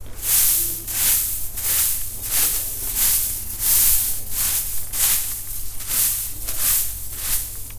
sweeping the shavings
Sweeping chips near the machine with a broom.
chips, factory, industrial, machine, machinery, mechanical, shavings, sweeping